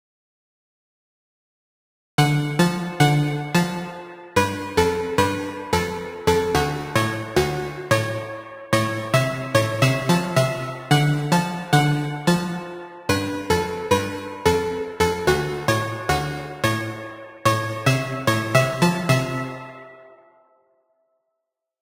City Wind Synth Loop 2
Wide wandering synth melody made with FM synthesis.
[BPM: 110]
[Key: G minor]
G, key-of-g, thick, Wind